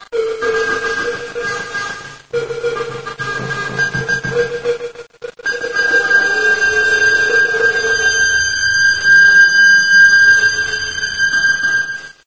Some feedback from a bent toy that plays over the radio. It played tapes, had a microphone, an input slot for other external sources, and a few built in sound effects.
effects, bent, feedback, glitch, circuit, microphone